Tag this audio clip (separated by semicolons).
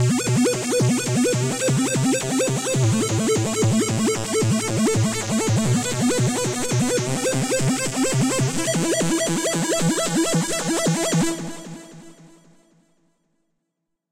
arpeggio; rave; hardcore; arp; uk-hardcore; synth; happy-hardcore; hardcore-rave; 170bpm; arpeggiated; riff; loop; hardcore-techno